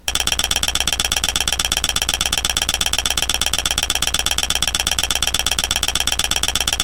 This sound is recorded by Philips GoGear Rage player.
There is not used real ratchet, but is replaced by something. I recorded the sound before year and don´t know, what i used. I maybe recall in time.
pilgrimage, gathering, noise, assembly, demonstration